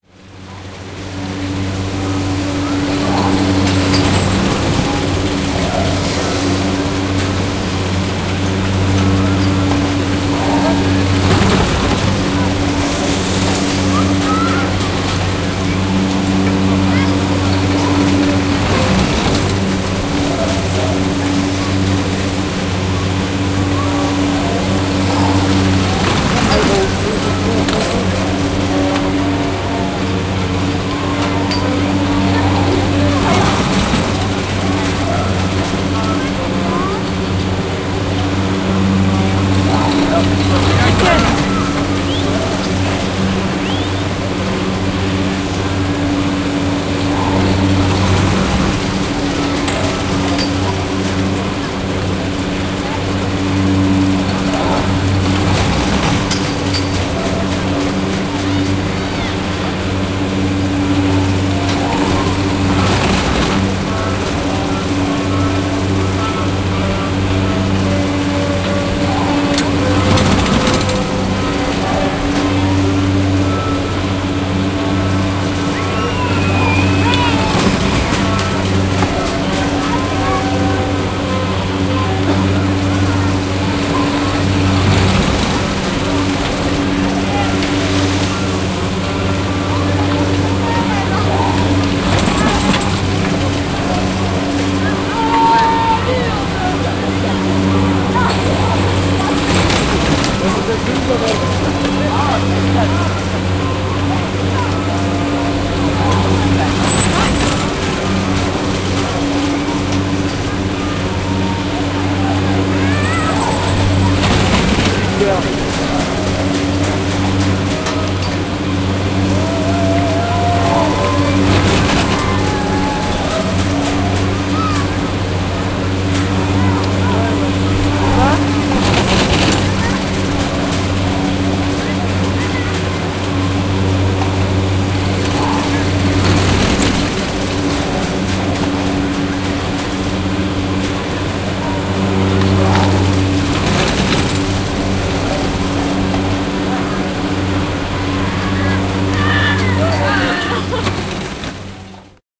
Field recording of a chairlift system in a ski resort in the french Alps. The recording was made under one of the towers. mechanical friction noise, cars passing, distant talking, occasional kids shouting, musical background at some point.Recorded with a zoom H2 in X/Y stereo mode.